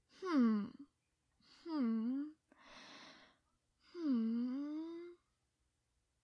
hmm?- curious
english, hmm, requst, sample, voice